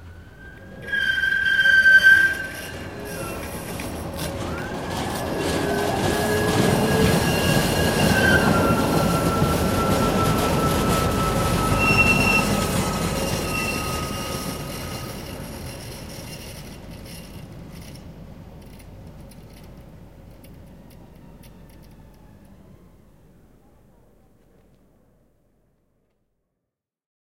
Squeaking tram arriving
Raw sound of squeaking tram in bend, departing from depo to tram stop. Includes interesting sound of crackling electricity in rails. Recorded in Brno at Namesti Miru square, CZR.
In-hand recording, Tascam recorder + windscreen.
In case you use any of my sounds, I will be happy to be informed, although it is not necessary. Recording on request of similar sounds with different technical attitude, procedure or format is possible.